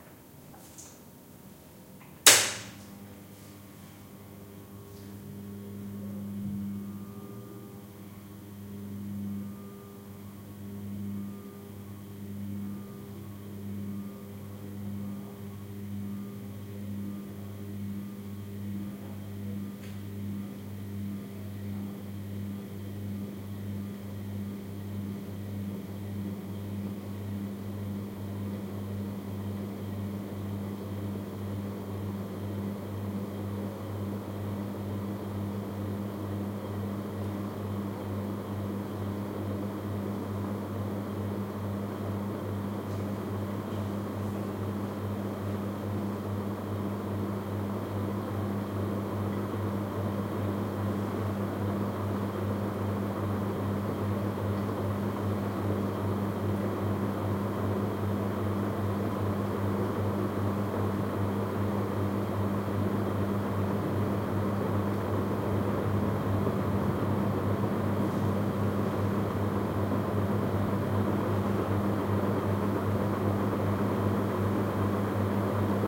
20180831.ceiling.fan starting
Noise of a Westinghouse ceiling fan, starting. Sennheiser MKH60 + MKH30 into SD Mixpre-3. Decoded to mid-side stereo with free Voxengo plugin.
air, heat, wind